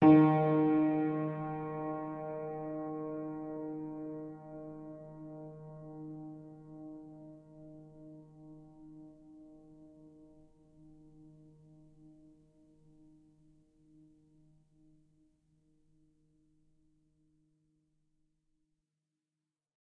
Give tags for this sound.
detuned
piano
old
string
pedal
horror
sustain

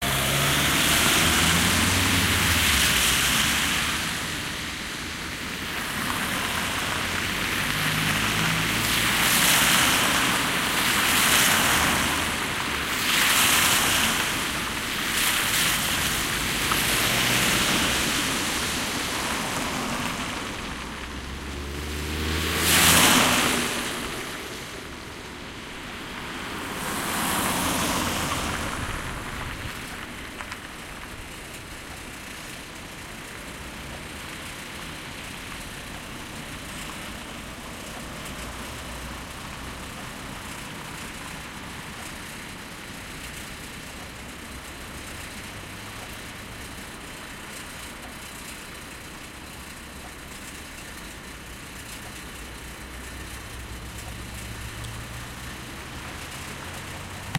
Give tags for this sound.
cars
motor
lights
car
moving
city
street
traffic
light

